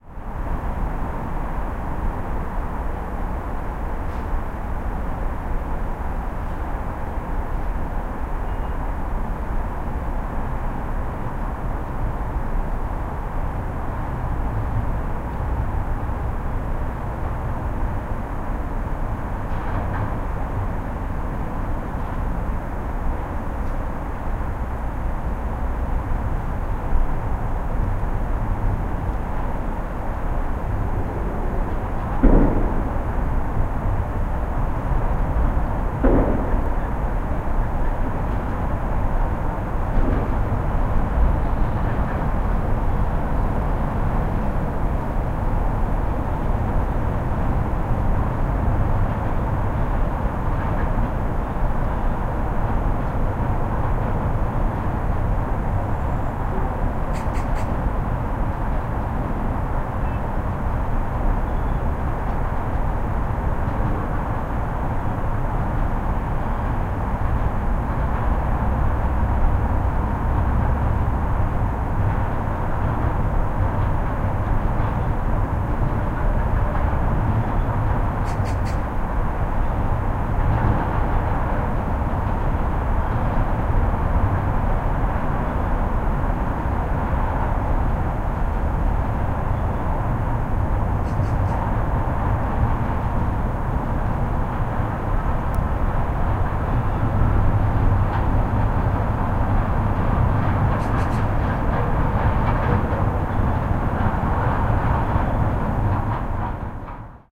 0032 Traffic background waiting metro
Traffic, construction, birds background. Music metro information
20120116